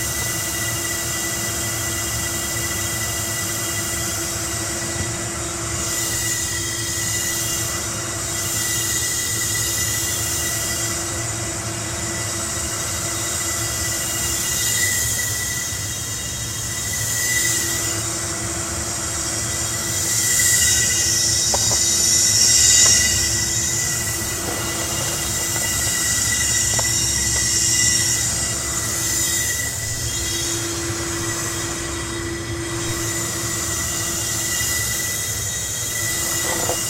gas pipe
field-recording, sample